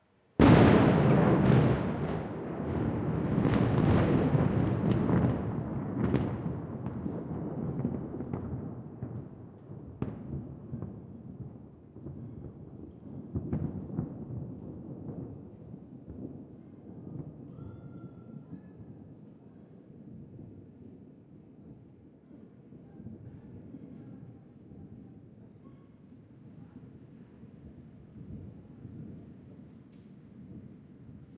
lightning strikes very close, thunder fades away. Luckily the recorder levels were set to minimum (and running on batteries!)
field-recording, lightning, explosion, thunder, storm